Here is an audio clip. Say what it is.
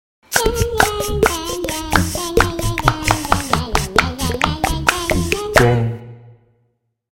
mouth music ethnic style
beat, beatbox, ethnic, music